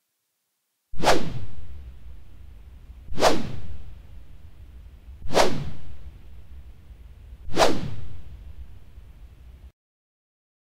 f Synth Whoosh 20
Swing stick whooshes whoosh swoosh
stick, Swing, swoosh, whoosh, whooshes